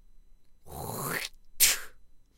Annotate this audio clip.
NPX Throat Clearing and Spit 4